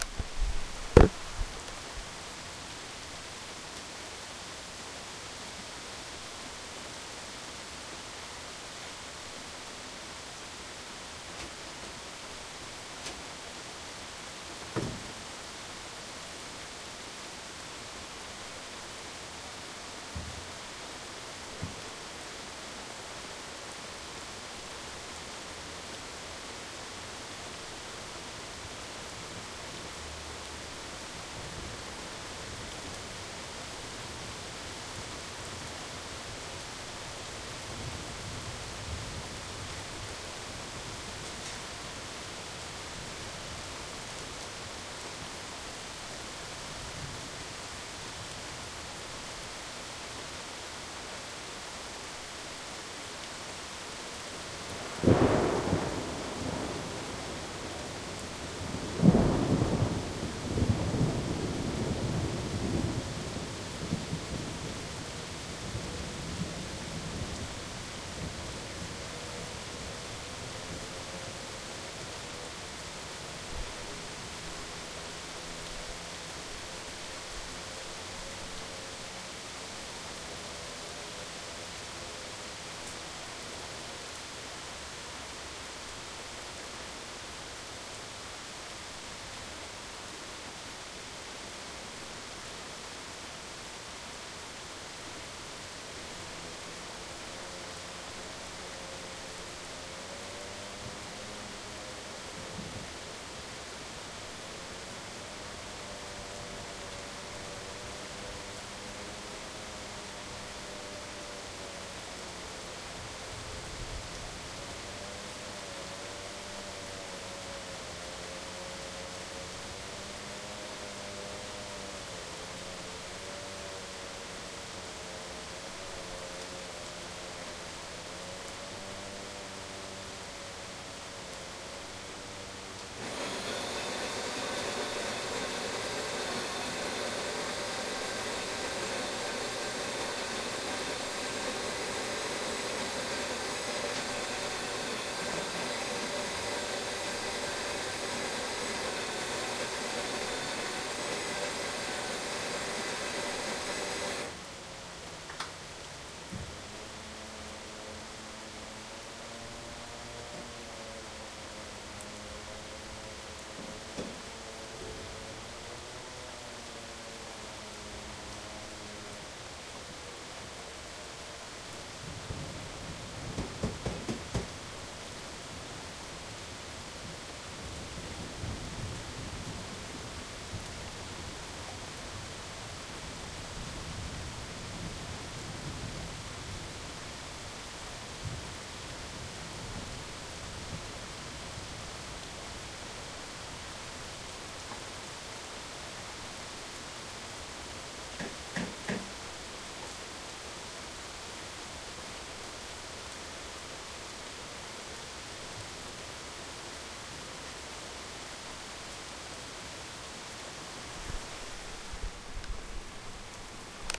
seattle rain thunder 333
Rain and thunder recorded with the zoom H2 from a 4th floor apartment balcony in Seattle WA.